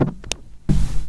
loop perc 3
This sample pack are the result of an afternoon of experimentation
engraved with a MPC 1000, is an old guitar with the pedal Behringer Echo Machine, I hope you find it useful
Este pack de muestras, son el resultado de una tarde de experimentación
grabado con una MPC 1000, es una vieja guitarra con el pedal Echo Machine de Behringer, espero que os sea de utilidad